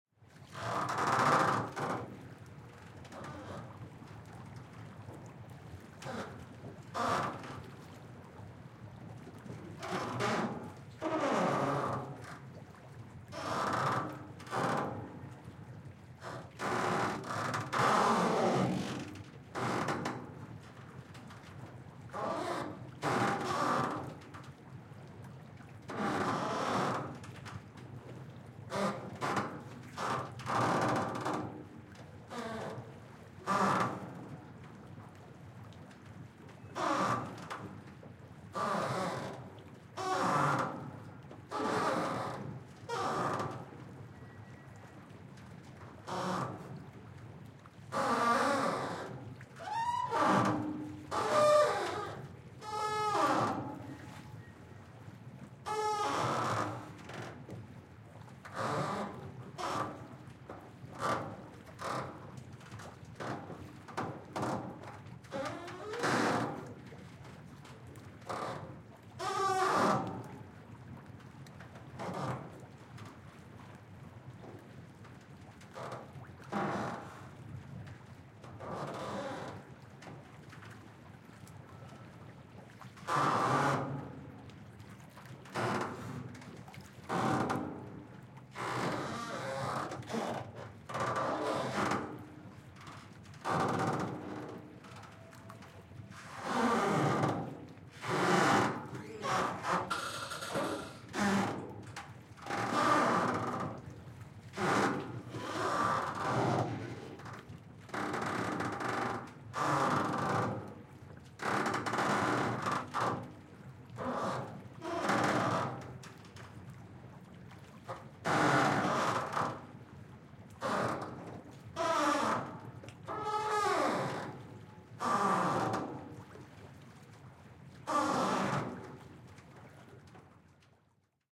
Ferry pontoon pier squeaking in light waves, rubber on metal
Hamburg City Harbour #1
A metal bridge over two pontoon piers is squeaking in the light waves of the Elbe river at the Hamburg city ferry terminal "Fischmarkt", sound of the waves hitting the pier are audible as well.
blends well with the other 2 recordings of the "Hamburg City Harbour" pack.
docks, field-recording, hamburg, harbor, harbour, pontoon, rubber, rubber-on-metal, squeaking, water